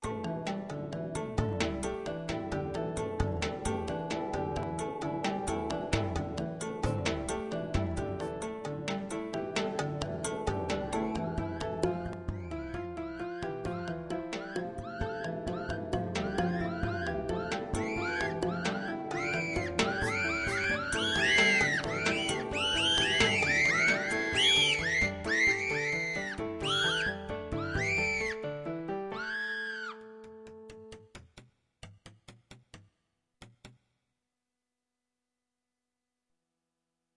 This is a remake of Bartok's "County Fair". It was originally input as MIDI into Digital Performer. Many of the original notes are changed with patches and some editing. Bartok's original rendition was with a single piano. Honestly after redoing it I thought he would turn over in his grave screaming if he heard my version. So, I gave it the name "Vivace, con screamo" also partly because of the scream section towards the end and of course the temp is Vivace, con brio (not necessarily in this one though). Enjoy!
vivace, rhythm, beat, bartok, drum, screaming, county-fair, con, screamo